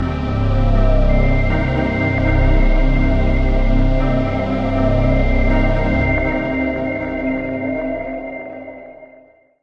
Little synth melody with a decent bass line.
scifi, massive, synth, harmonics, delay